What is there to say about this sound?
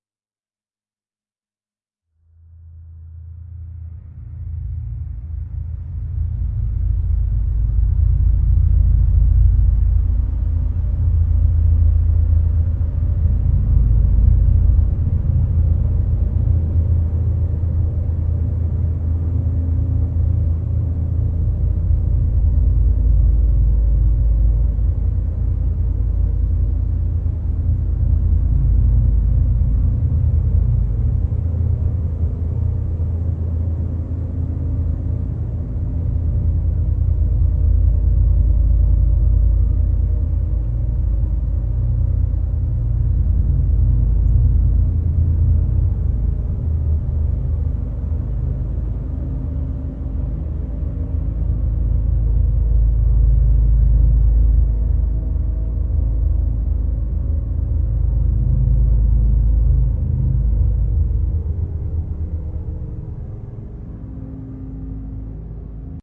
Use this as a bottom layer for your dramatic film scenes to build up tension. Like sample DD1, but only lowest frequency content. Audition on large speakers.